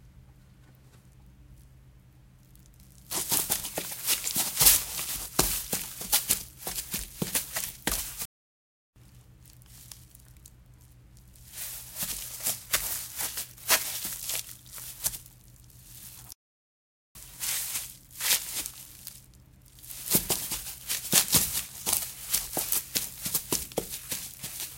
Running Footsteps on Grass
Foley recordings of running through grass and/or leaves.